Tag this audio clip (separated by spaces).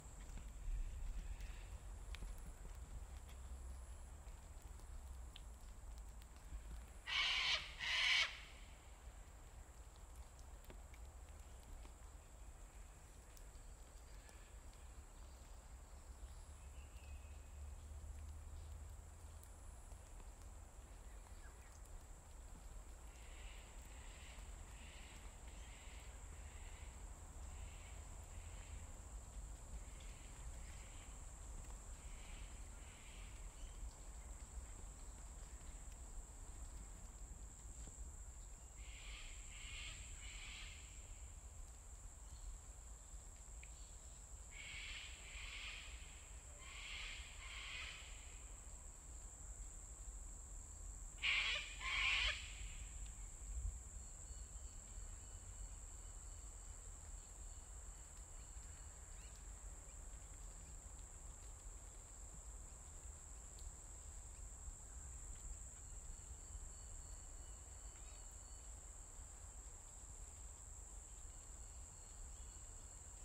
mount-coot-tha Sennheiser mkh815 australia wildlife birdsong birds insects forest nature Shotgun field-recording Brisbane calls cockatoo bird